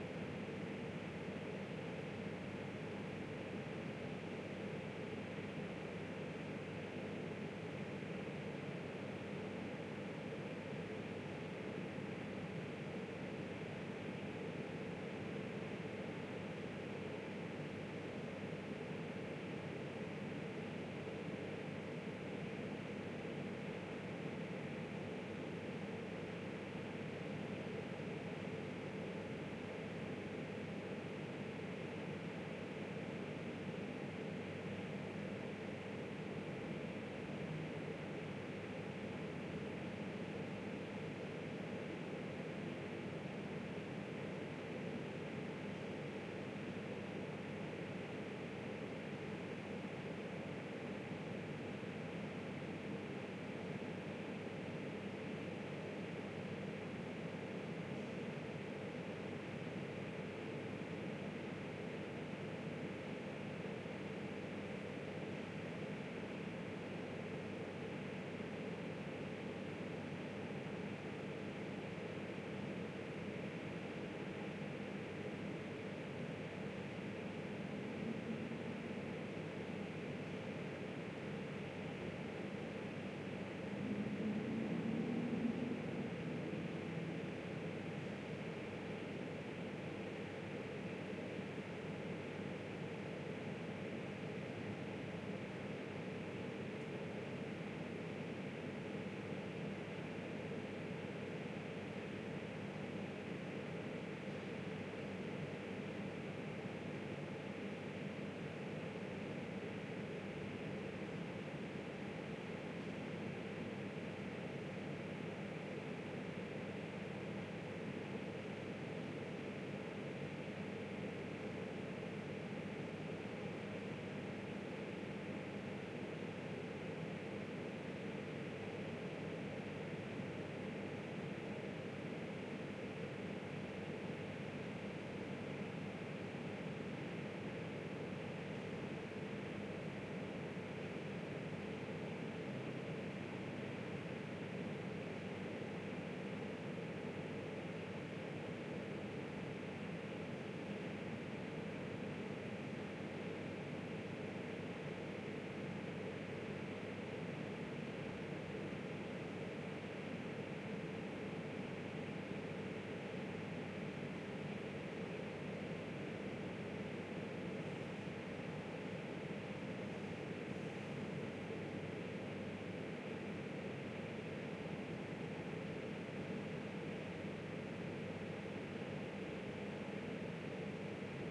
This is sound recorded inside a 10 meter high closed atrium of a transformed industrial warehouse building. Outside sounds like electrical installations on the rooftop, city sounds etc. are captured and resonate inside the space.Recorded with a Rode NT2-A and a Rode NTG-2, MS stereo setup to Sound Devices 702. No processing.